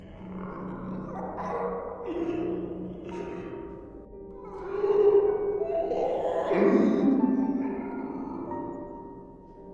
horror mix
A sound mix with a kind of moaning monster, a rather strange piano and an oppressive pad in the background. Made with Audacity.
spooky, scary, ambience, mix, zombies, dramatic, background, piano, creepy, terror, horror